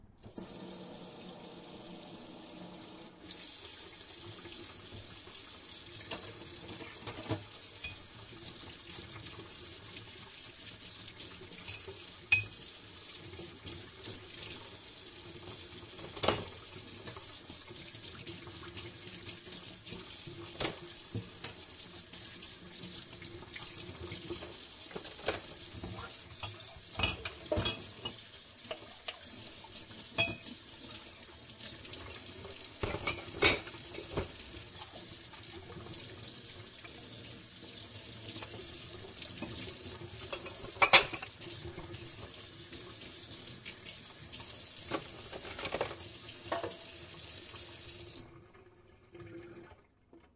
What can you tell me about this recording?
Dishes being cleaned in metal sink with water running